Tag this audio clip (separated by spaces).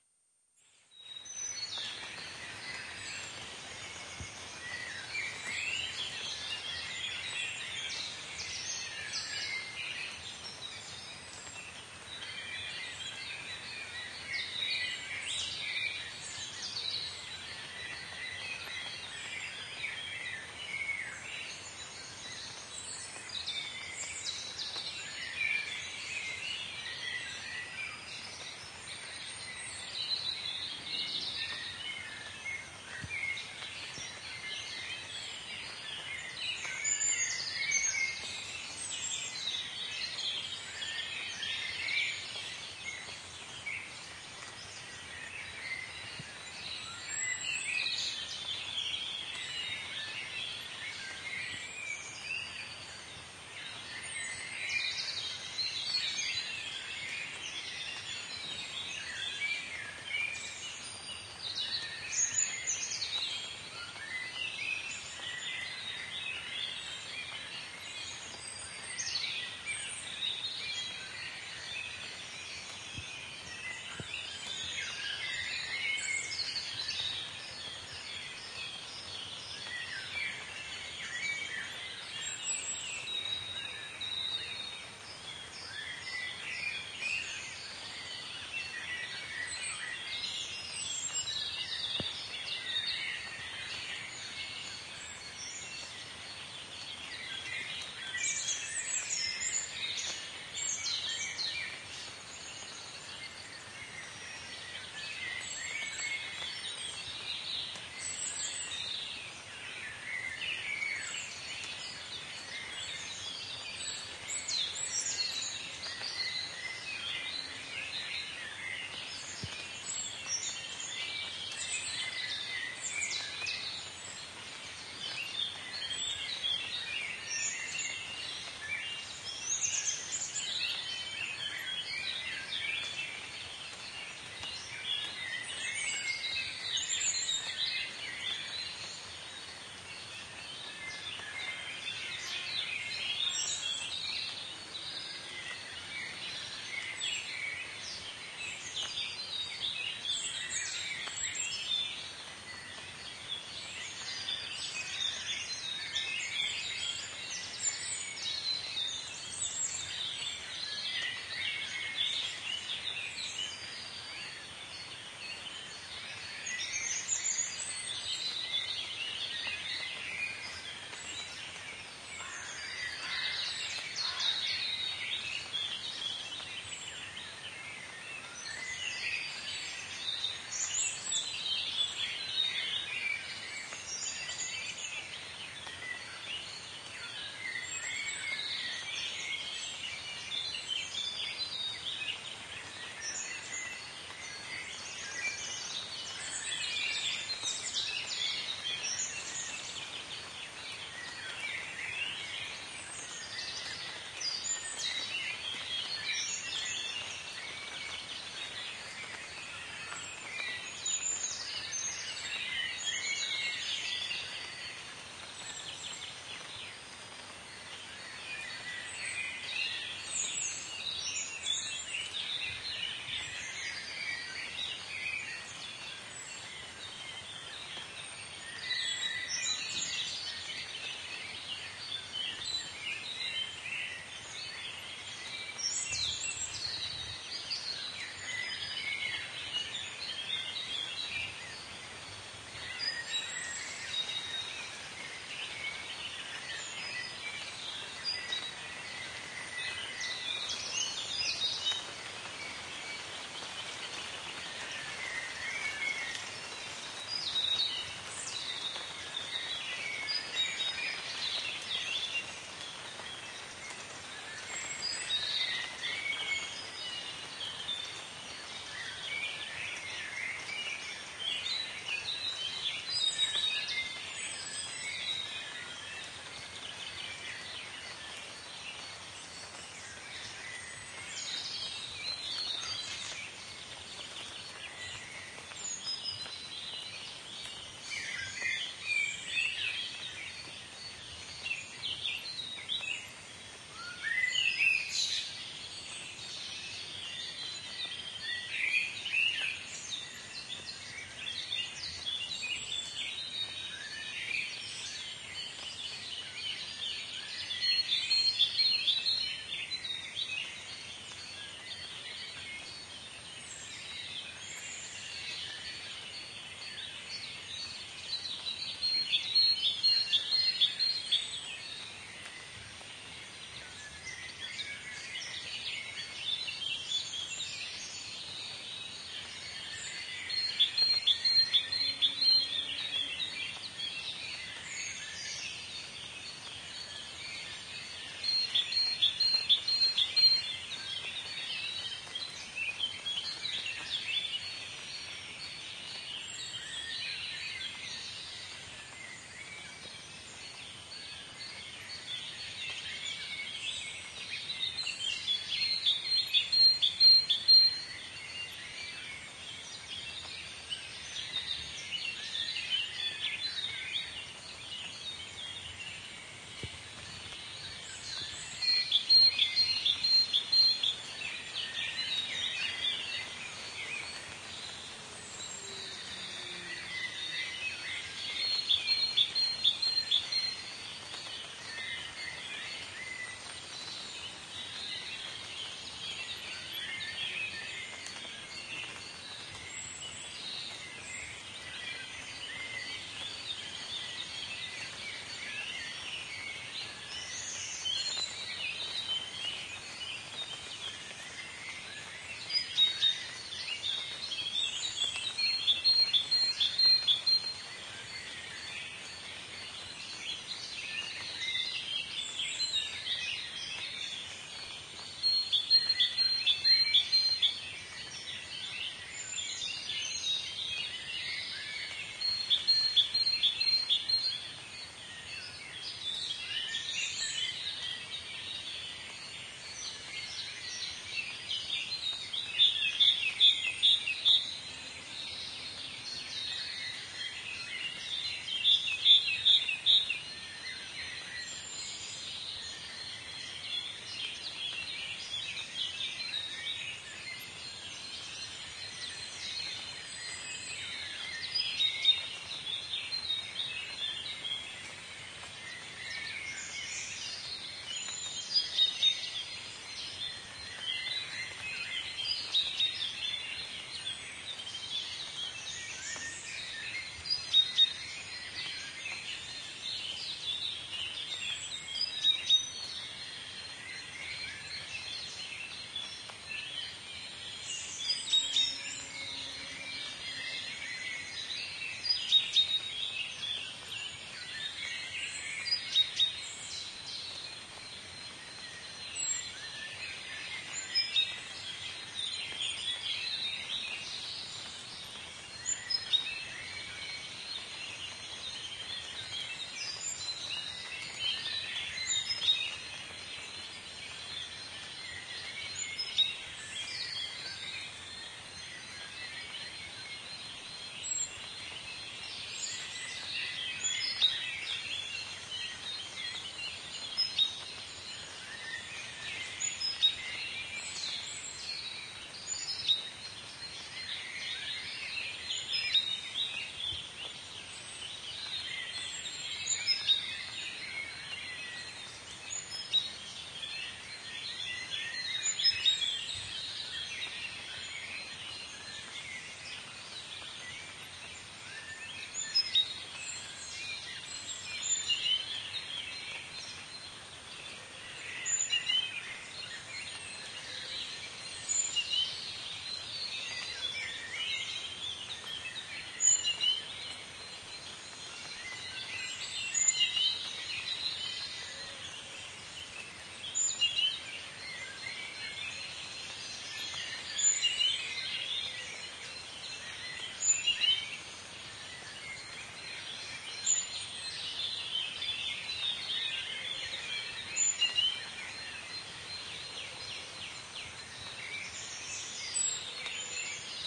bird birdsong dawn field-recording forest morning rain song spring